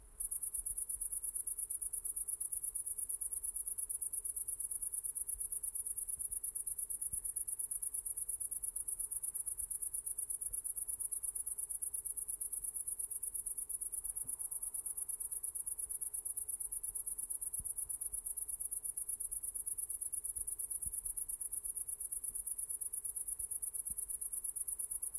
Grasshoppers, recorded with a Zoom H1.